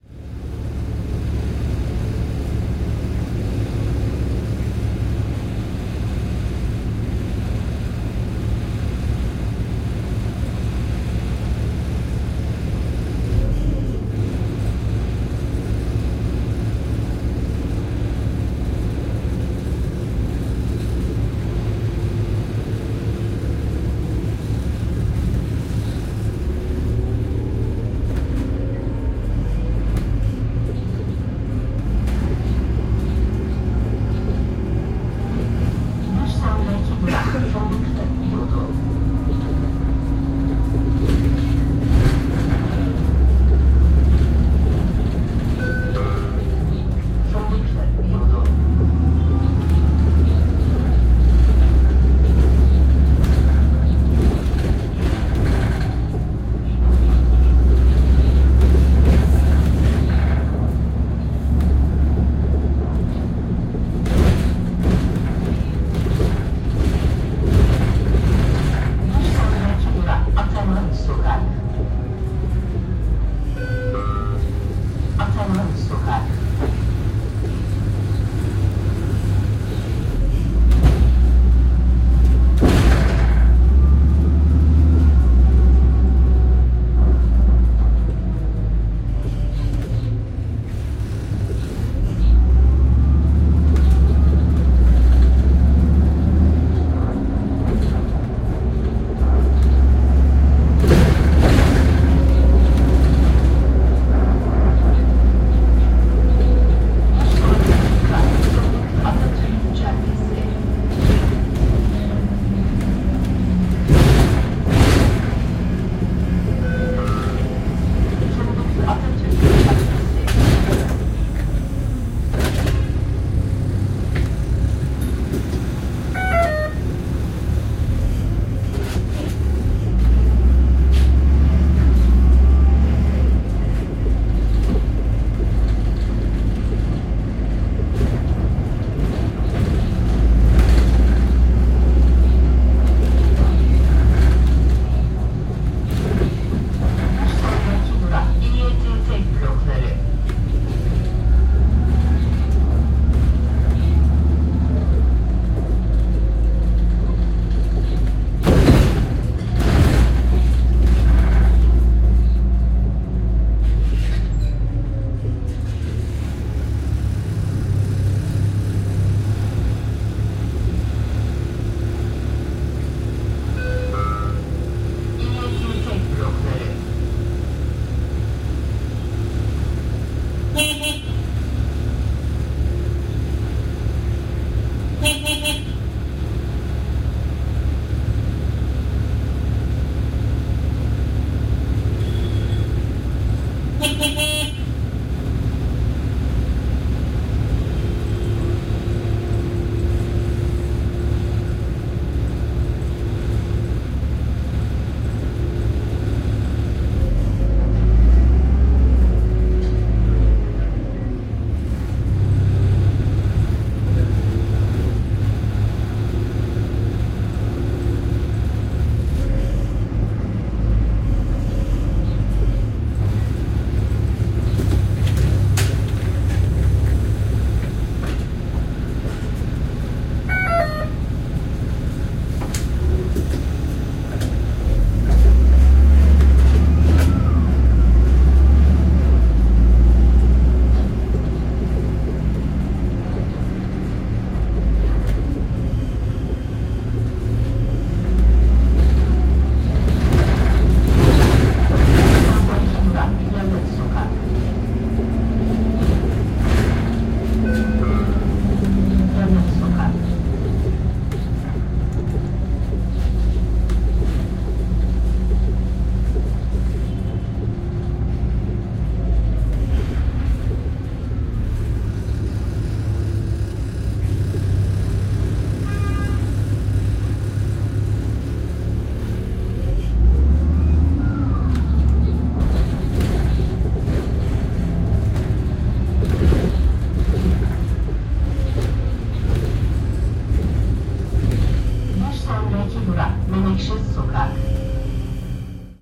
Bus with rattle
Sound of a bus. Includes some rattling, doors opening closing, passenger sounds and stop announcements in Turkish.
doors-open, doors-close, stop-announcements, hiss, bus